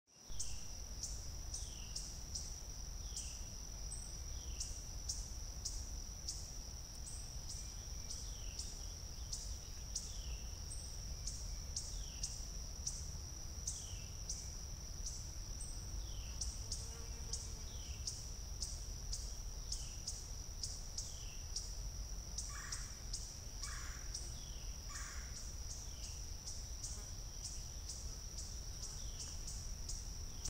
palmyra Cove July 5 2021 5
Recorded with a phone and edited with Adobe Audition.
Palmyra Cove Nature Preserve, Palmyra, NJ, USA
July 2021